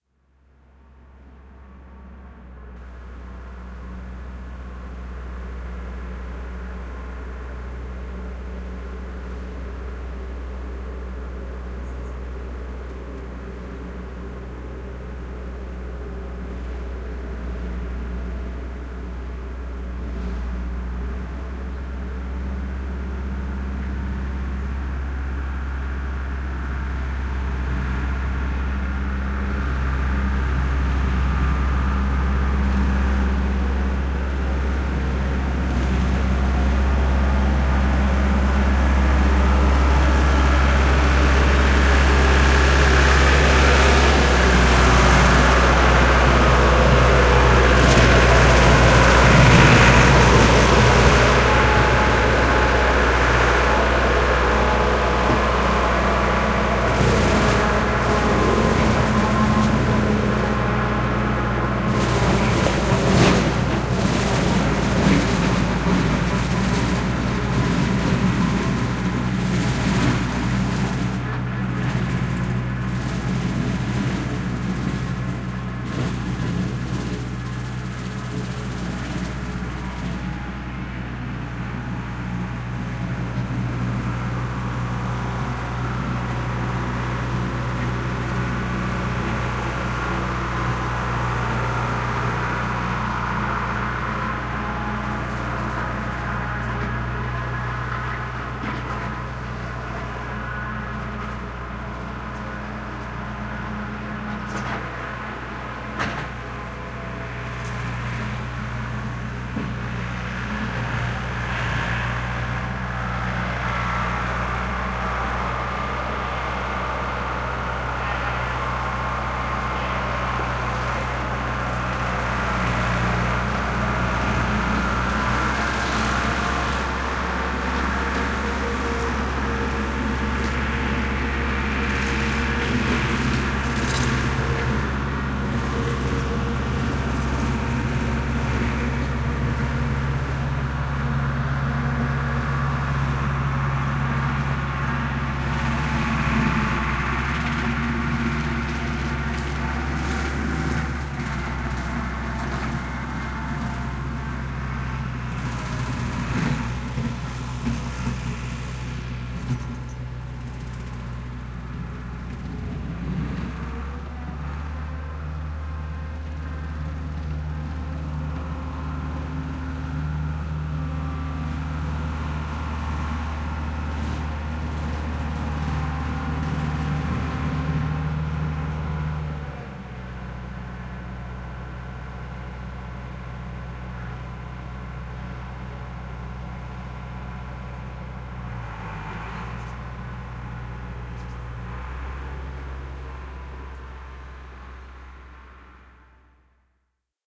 Tractor And Topper Compressed
A stereo field-recording of a Renault tractor on rough terrain with a flail topper operating behind it . Rode NT4 > FEL battery pre-amp > Zoom H2 line-in.
pastoral, xy, stereo, farm, flail, agricultural, rural, tractor, machinery, grassland, field-recording, topper, mower, diesel, field